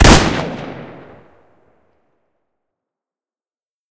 Shotgun Firing sound I made using multiple SFX sources.